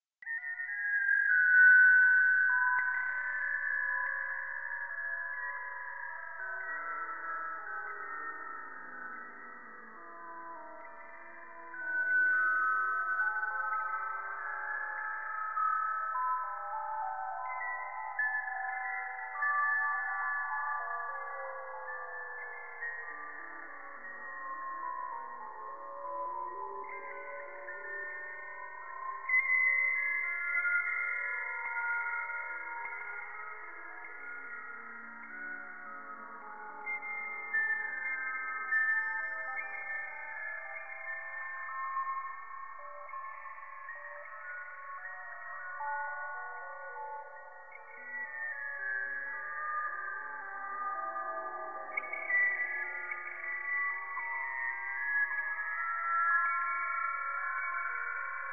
A dronesample which sound distorted, metallic and weird. This is from a Virus b synth.
ambient, distorted, drone, weird